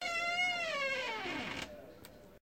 A door creaking
hinge, door, creaking